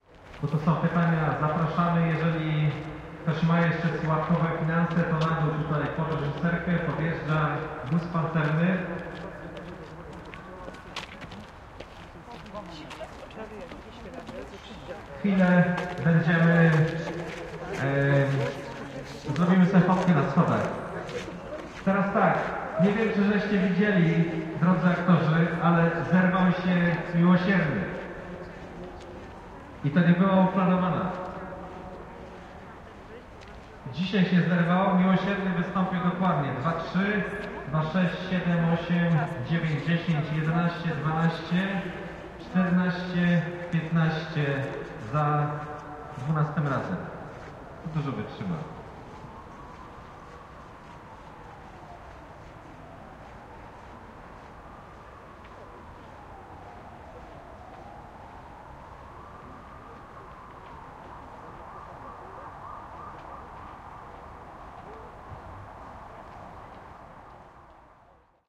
after the mystery of the Passion 19.03.2016 Poznań 002
Short speech made by coordinator of the event.